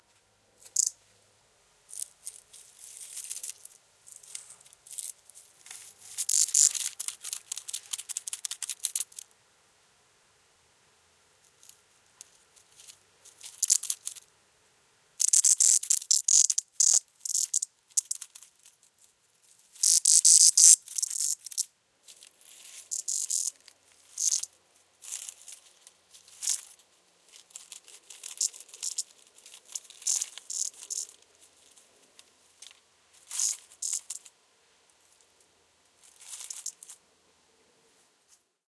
Insect buzz scurry heast bug electrical distorted pbol 77mel 190906
Close up, cicada on the ground, scurrying around, intermittent loud buzzing. Homemade parabolic with EM172s.